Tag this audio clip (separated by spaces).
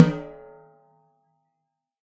drum 1-shot tom multisample velocity